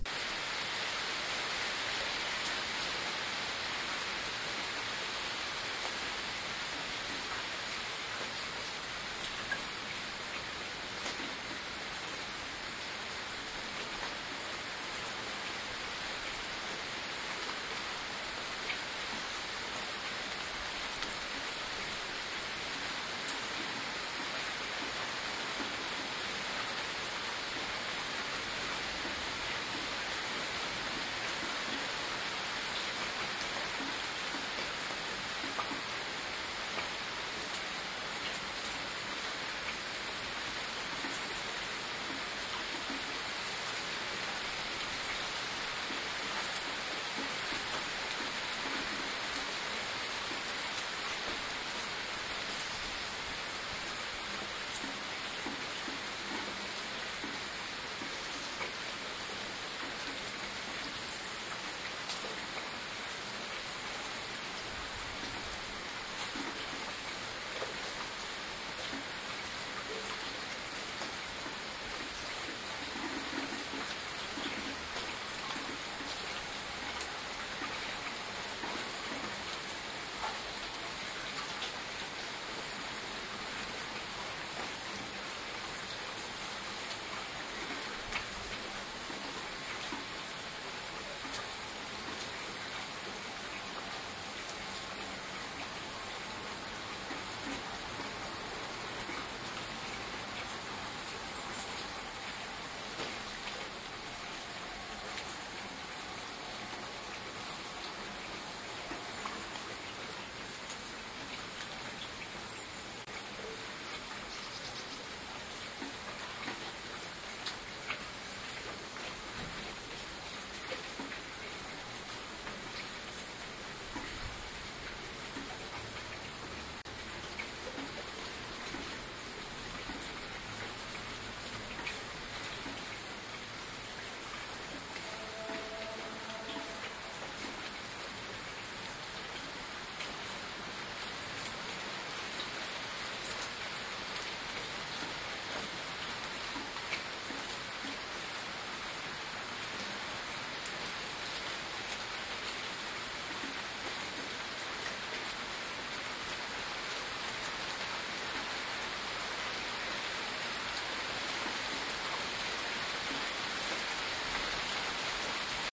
Rain in the backyard
Another one... well, here we go again. Rain in a backyard in town. One
can also hear an electric device to open a garage and a distant train
using its horn. Sharp MD-DR 470H minidisk player and the Soundman OKM II binaural microphones.
binaural, field-recording, rain, town